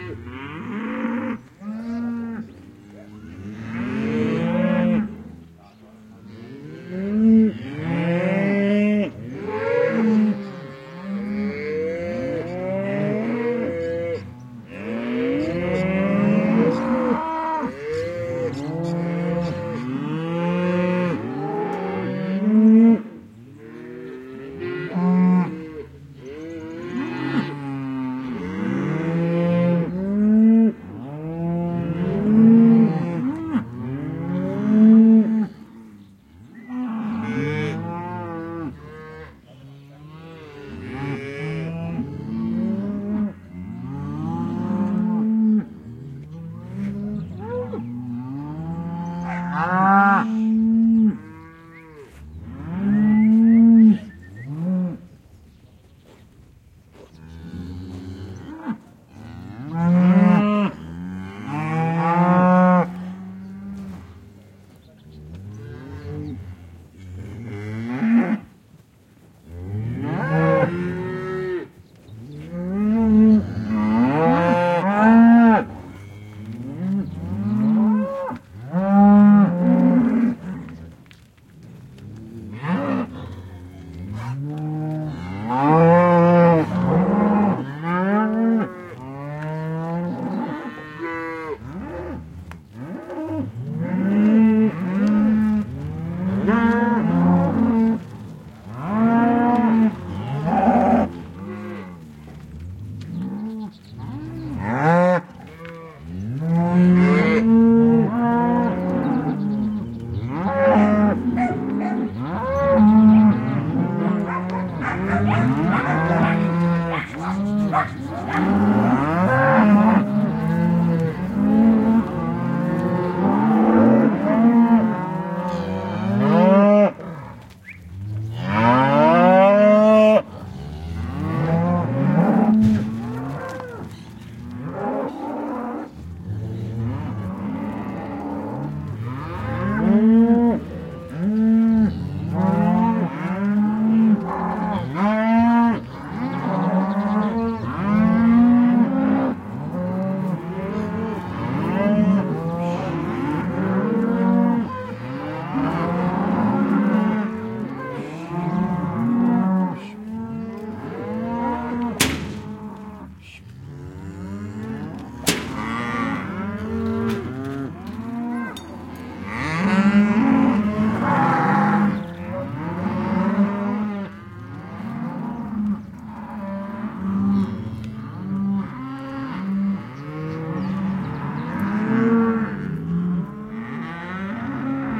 Amb cowboy cow dog gaucho
Herd of cows, with gauchos cowboys whistling and dogs barking.